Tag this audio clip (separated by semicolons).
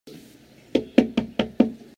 door; knocking; stuff; wood